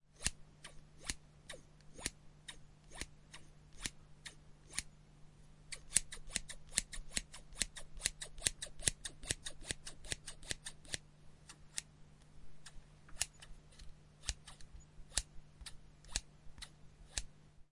Raw audio of metal scissors cutting through air.
An example of how you might credit is by putting this in the description/credits:
The sound was recorded using a "H1 Zoom recorder" on 12th April 2016.
cut, cutting, scissor, scissors